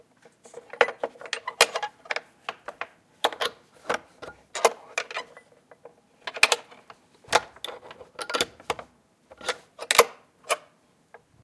Cabin hook closed and opened
Cabin hook of a wooden door latched close and open a few times. Recorded inside a large wooden goahti at Ylläskaltio hotel in Äkäslompolo, Finland.
cabin-hook, close, door, field-recording, finland, lapland, latch, metal, open, wood